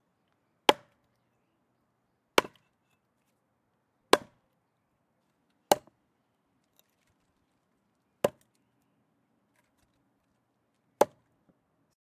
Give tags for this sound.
sfx
sound-effect
splitting-wood
axe
hitting
hacking-wood
hatchet
OWI

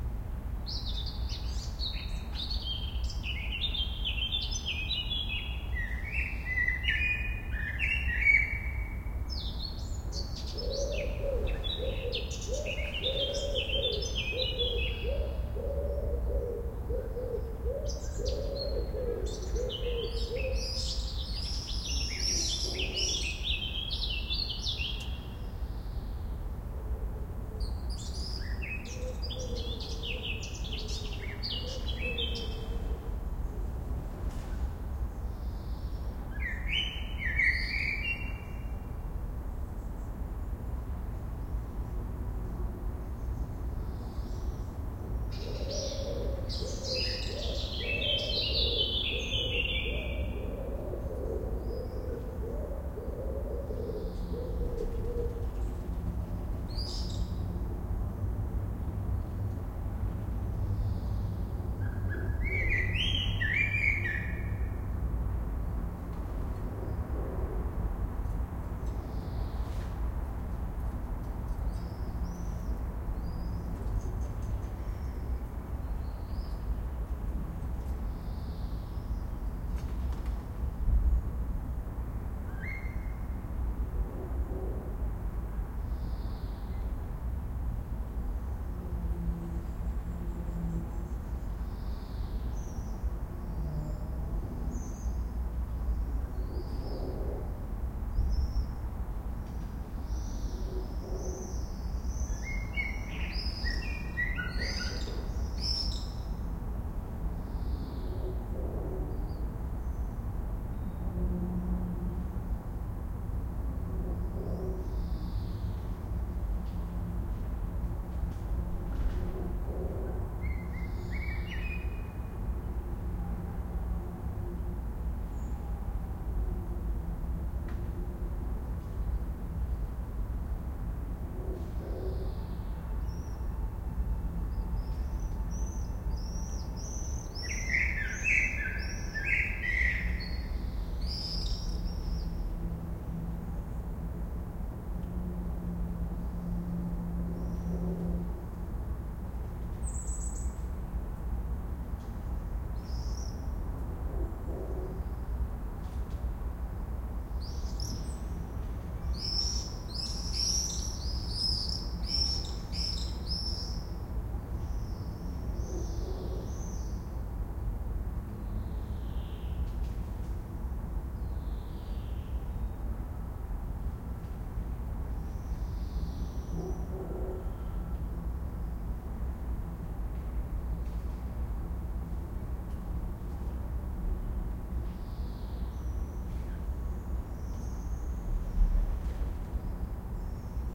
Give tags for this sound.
blackcap
town
morning
city

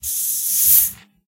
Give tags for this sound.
Hiss
Fizzy
Coca-Cola
Fizz
Open
Coke

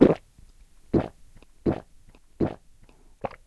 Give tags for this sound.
water
throat
swallow

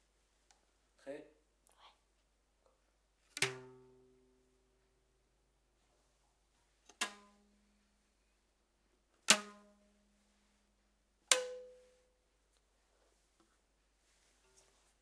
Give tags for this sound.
elastic
notes
rubber-band
strange

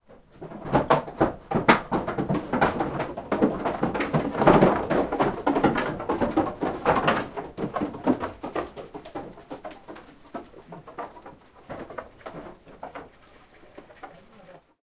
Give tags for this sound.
b
Bleat
boards
bois
Ch
de
farm
ferme
Goat
le
lement
marche
mas
pas
platform
quai
steps
traite
vre
wooden

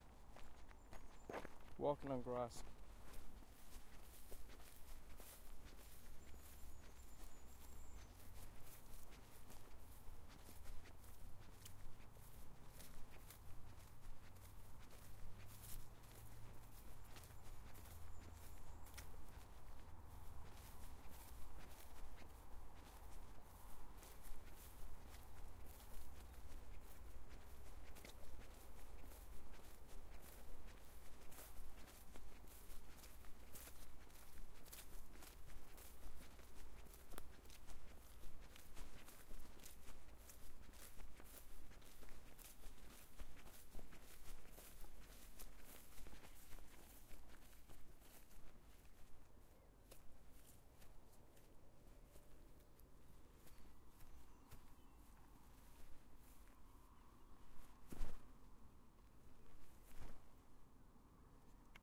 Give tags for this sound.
birds
cars
driveby
engine
feet
field-recording
foley
foot
footstep
footsteps
grass
road
shoes
steps
summer
walk
walking

